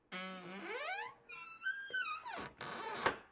Squeeky Door Close
Squeeking Door Closing
Close, Door, Squeak